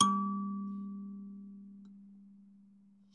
Totally awesome Kalimba, recorded close range with the xy on a Sony D50. Tuning is something strange, but sounds pretty great.